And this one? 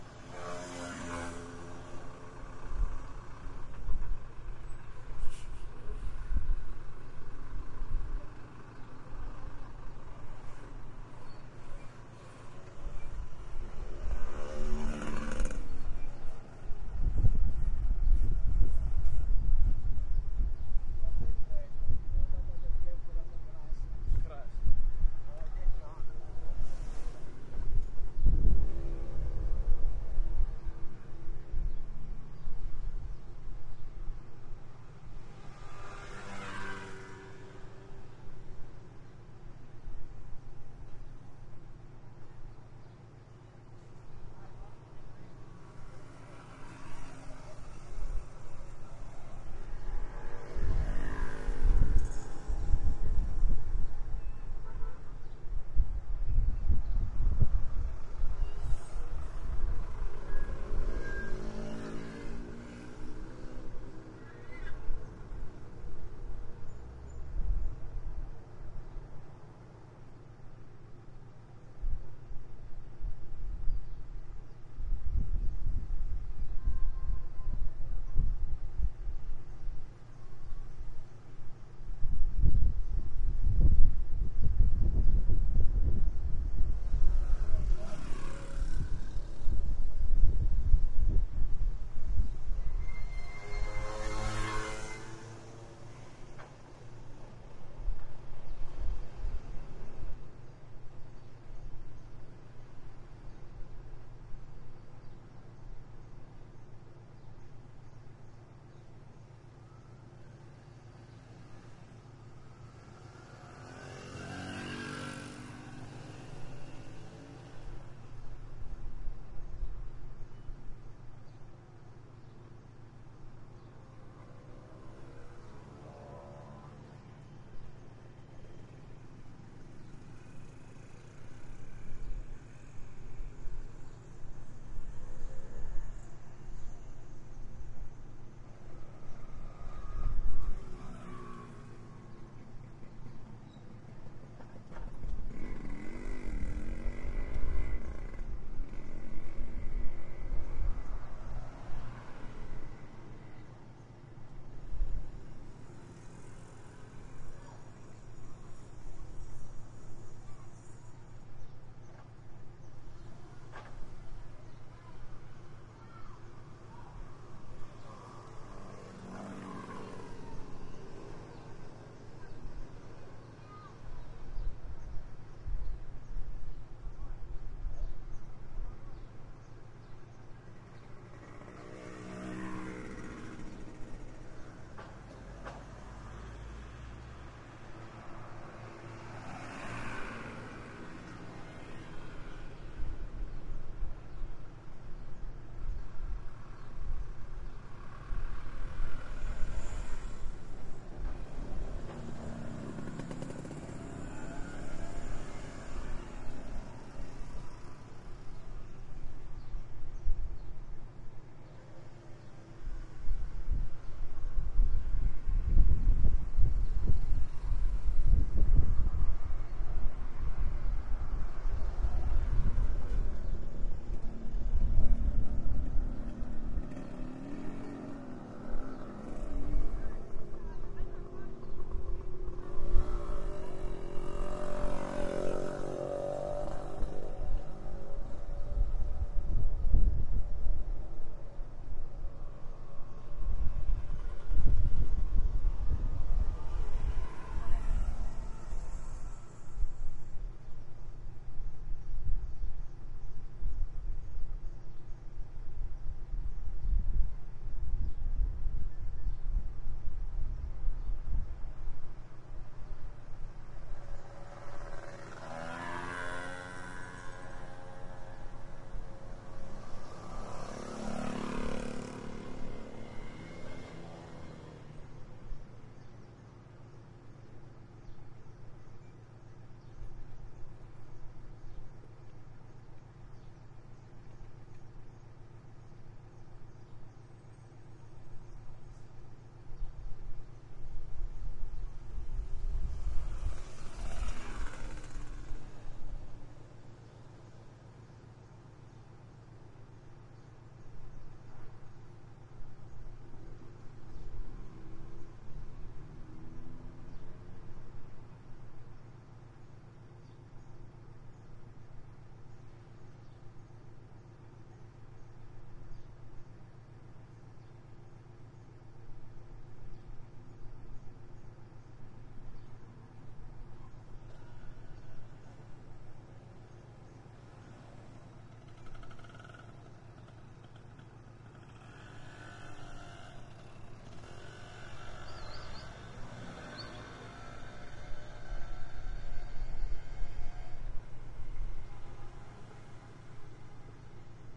San Pedro de Macoris-May 13-2
Soundscape recording on the street corner outside the Esperanza offices in San Pedro de Macoris in the Dominican Republic. May 13, 2009.
corner, pedro, motoconchos, dominican, street, san, de, macoris, republic